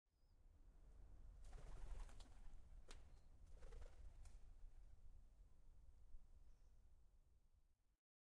Pigeons taking off at Parque de Serralves, Porto.